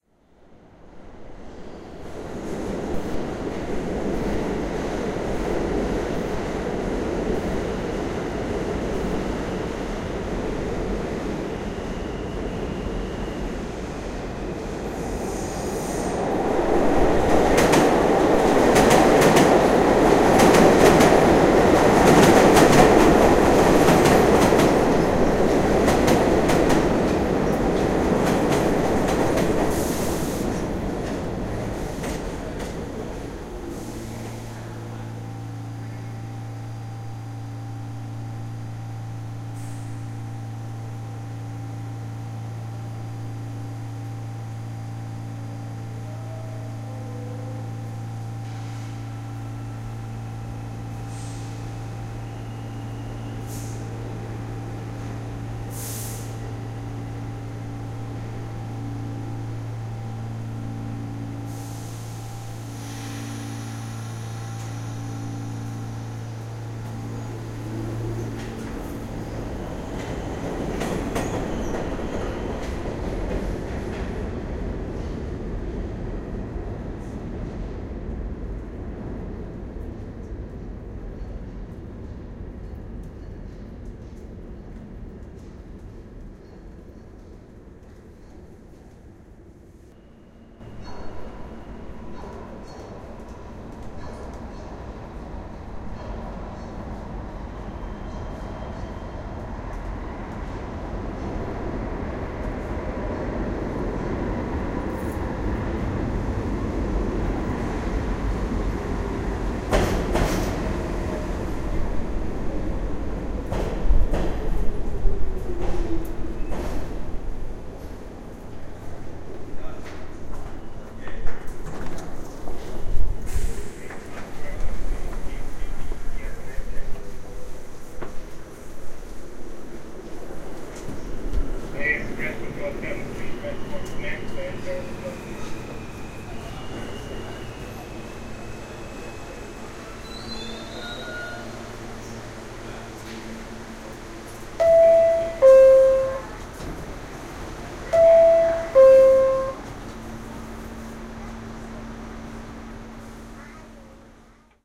W 4 ST STATION PLATFORM
NYC MTA Subway, waiting on W 4 St. platform, weekday afternoon.
Approaching, idling and departing downtown-bound trains, across track. General ambience. Announcements, "ding dong", track sound.
Uptown A train approaches, stops, move aboard, announcement, "ding dong" of closing doors x2.
Stereo recording. Unprocessed.
NYC, underground, mass-transit, subway-platform, New-York, MTA, subway